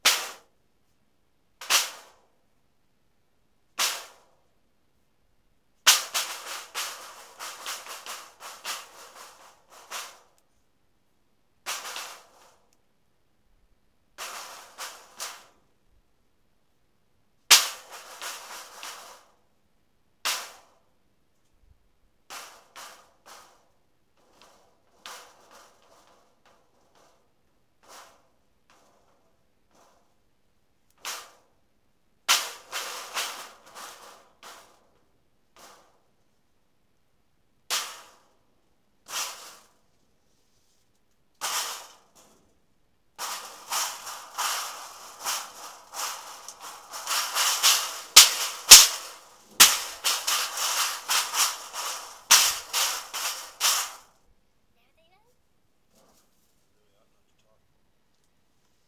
Raw recording of aluminum cans being thrown at a tin heating duct. Mostly high-band sounds. Some occasional banging on a plastic bucket for bass.